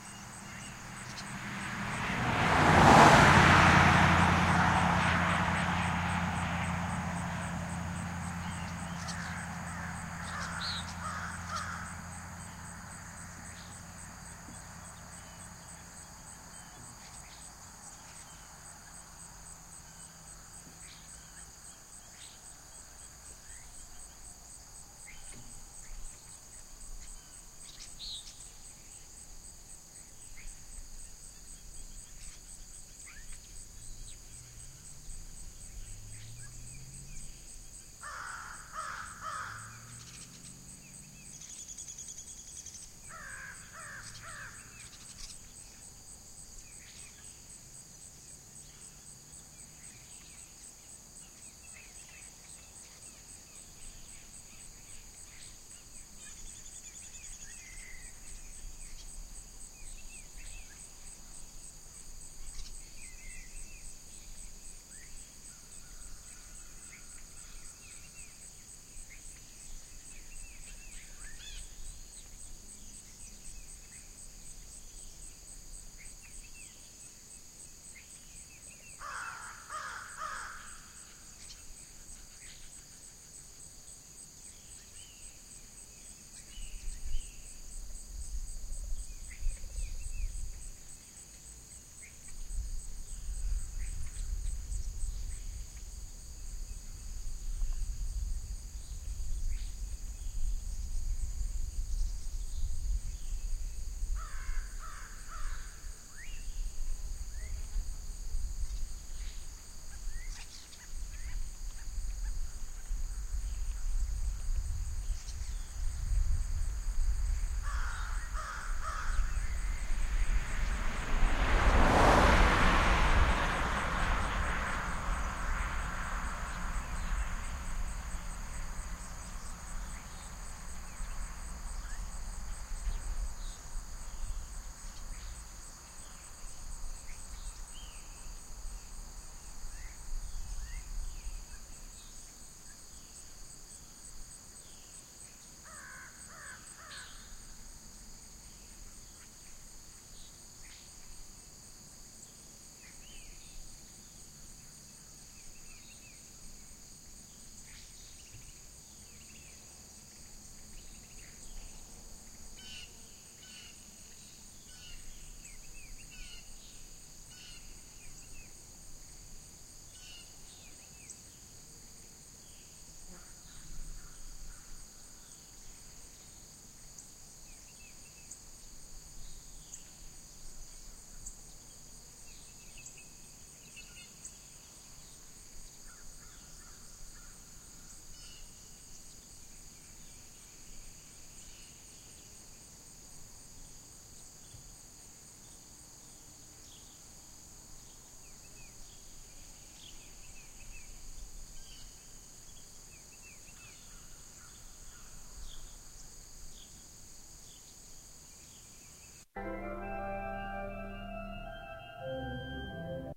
Ben Shewmaker - Old Military Road Morning
Morning on Old Military Road